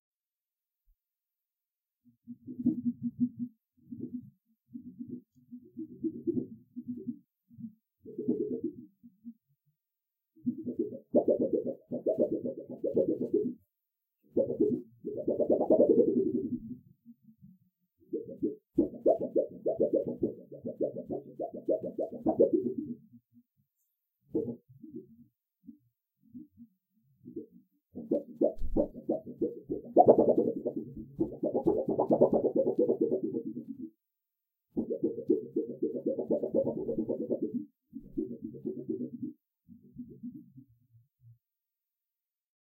Wobble Board: repeatedly flipping a hardboard at various speeds.
hardboard, OWI, Wobble, Wobble-board